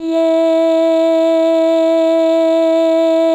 yyyyyyyyy 64 E3 Bcl
vocal formants pitched under Simplesong a macintosh software and using the princess voice
formants, synthetic, voice